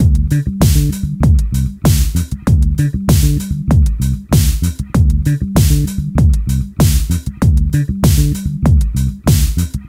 PHAT Bass&DrumGroove Dm 25
My “PHATT” Bass&Drum; Grooves
Drums Made with my Roland JDXI, Bass With My Yamaha Bass
Loop-Bass, New-Bass, Jazz-Bass, Bass-Samples, Funky-Bass-Loop, Ableton-Loop, Beat, Logic-Loop, Bass, Compressor, Bass-Groove, Groove, Fender-PBass, Drums, jdxi, Ableton-Bass, Funk-Bass, Soul, Funk, Bass-Loop, Fender-Jazz-Bass, Synth-Loop, Synth-Bass, Bass-Recording, Hip-Hop, Bass-Sample